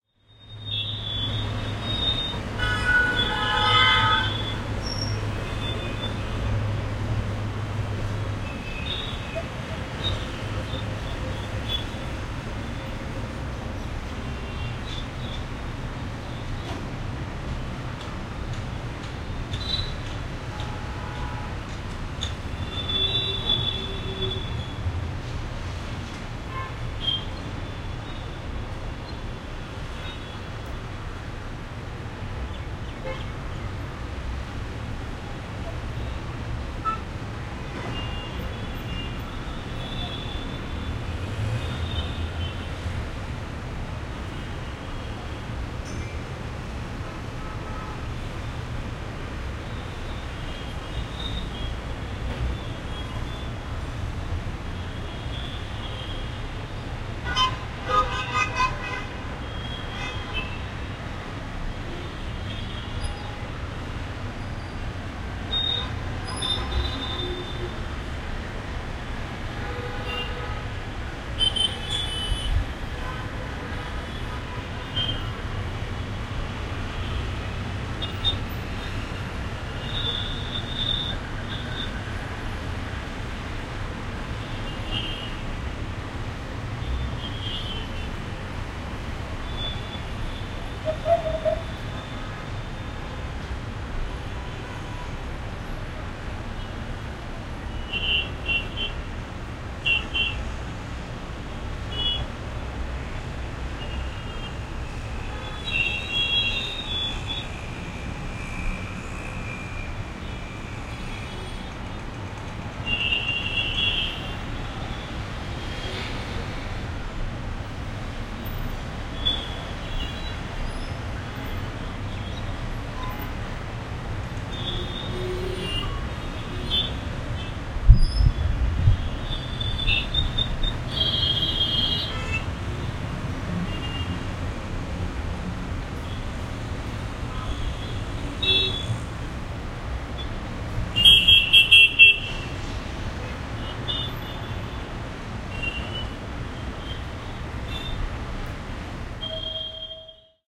Ext Mumbai City Traffic Ambience
Recording of afternoon traffic in Mumbai city. Recorded from a building balcony 20 storeys high.